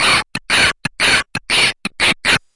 BentPhoneFX7 IBSP1
This samplepack contains 123 samples recorded from a Cicuit Bent Turkish Toyphone.
It has three subfolders containing a) sounds from the Toyphone before bending, (including the numbers from 0-9 in Turkish), b) unprocessed Circuit Bent sounds and c) a selection of sounds created with the Toyphone and a Kaoss Pad quad.
bend, bending, circuit, glitch, phone, toy